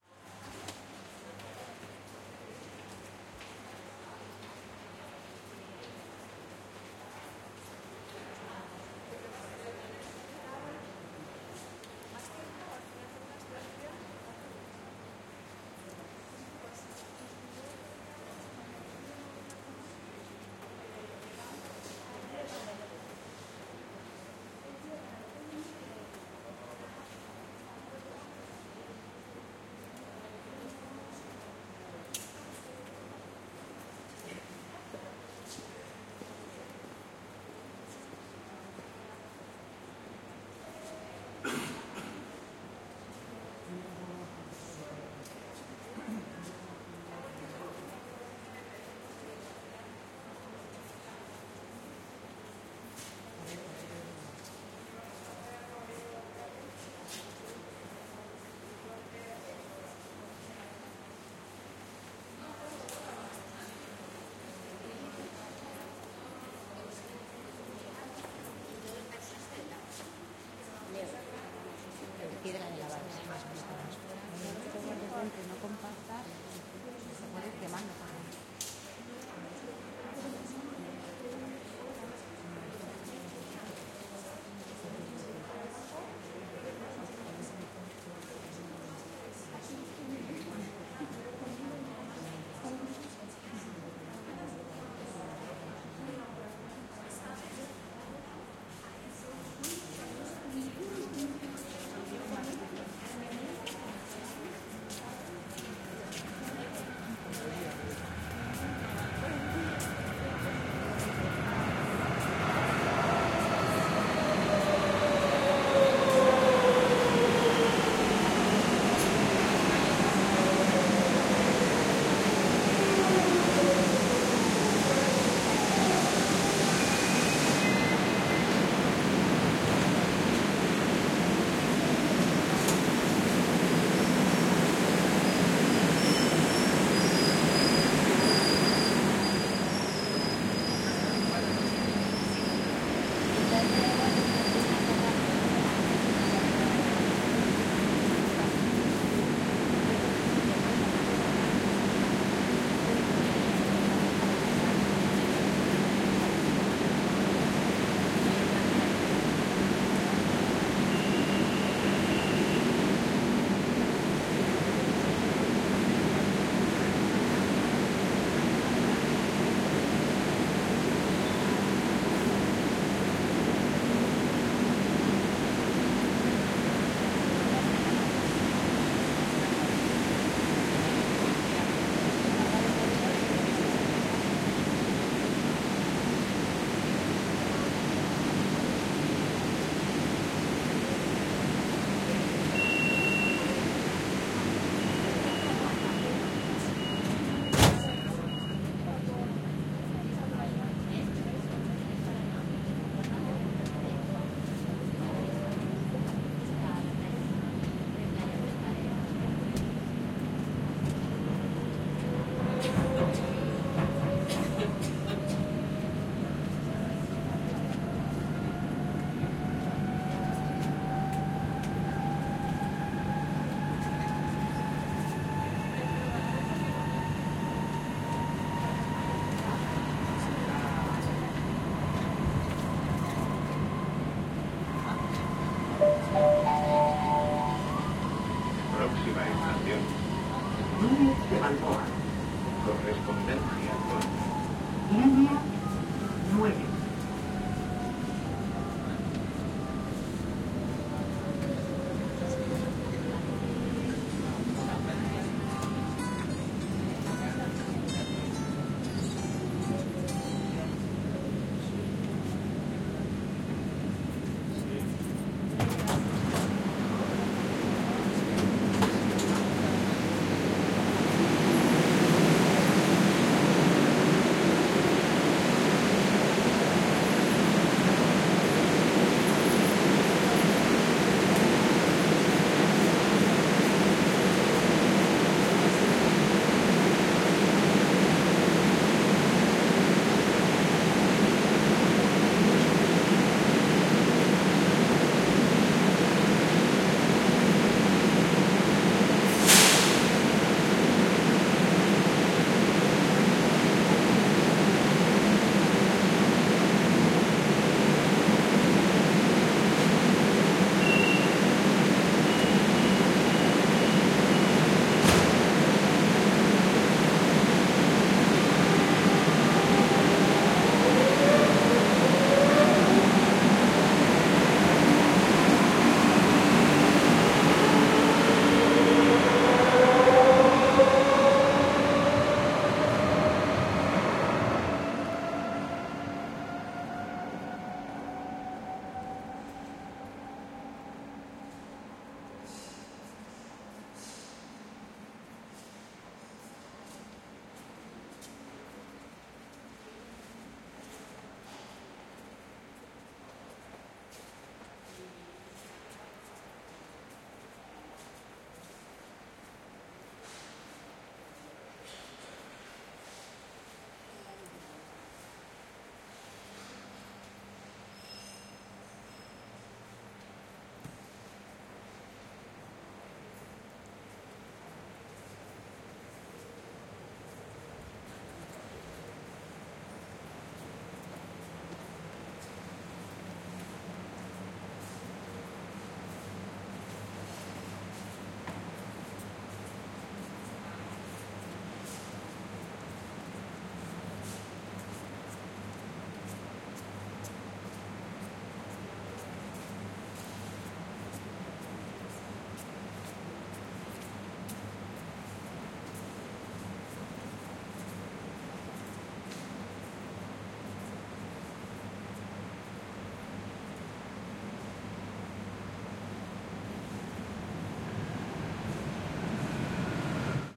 INT Madrid Train Station and Subway Train DAY: spanish speaking, train arrives, board inside train, leave train, platform amb, train leaves
This is an ambient sound effect traveling on the subway system in Madrid at 11:30am, September 2019. The recording is on the platform, then boards, and exits the train; the train then departs and there is a period of platform ambience.
Recorded with Shure MV88 in Mid-side, converted to stereo.